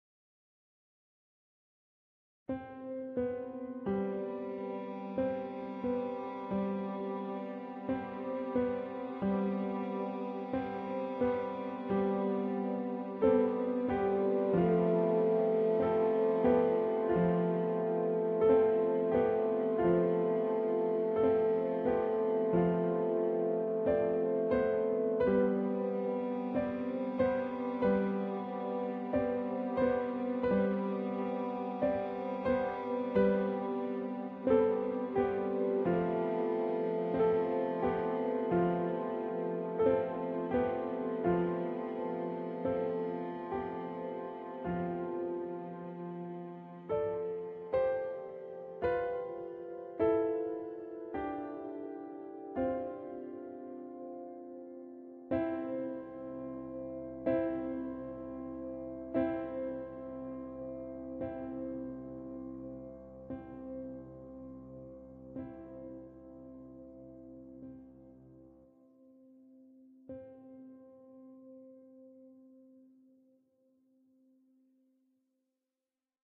Hope ( Music sad melody )
sad, sadness, hope, melancholy, piano, melody, tragic